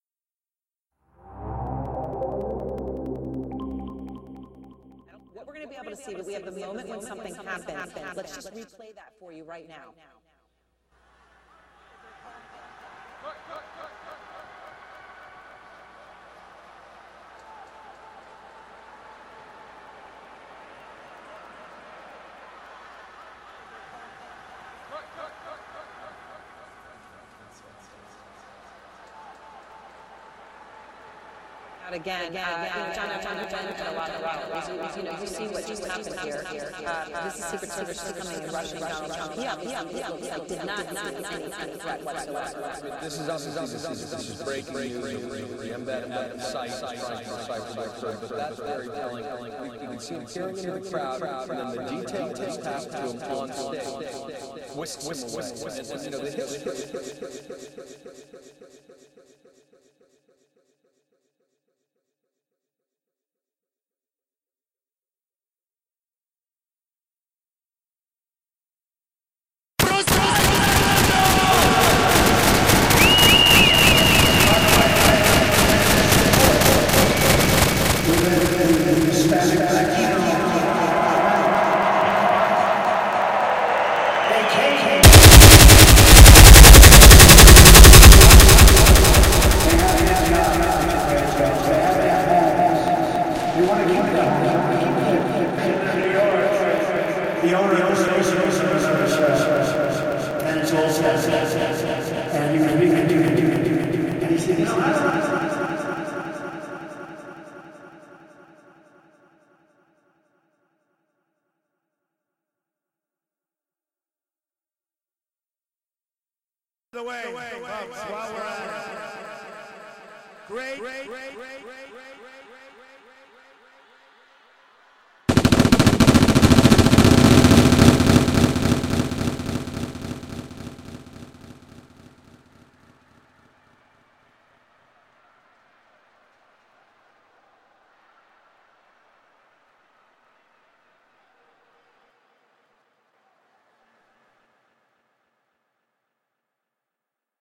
trump what
srry Nautural reverb coused by computer errors
says, things, trump, vomiting